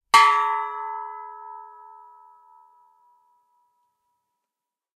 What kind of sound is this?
A stereo recording of a small propane gas bottle struck with a rubberised handle.. Rode NT4 > Fel battery Pre-amp > Zoom H2 line-in.
bell, percussion, xy, metal, stereo, clang